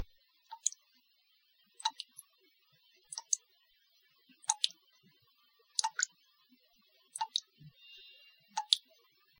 Slow Dripping
Slow water drips from the kitchen faucet to a bowl of soapy water.
drip, liquid, trickle, water